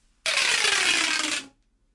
One of those bendy straw tube things
bendable, bendy, hollow, noise-maker, pop, popping, snaps, toy, tube